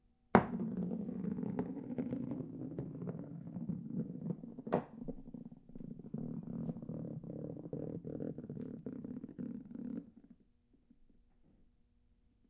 a croquet ball rolling across the floor, hitting a wall, and rolling back
croquet, ball